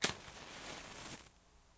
Slide on cloth with snap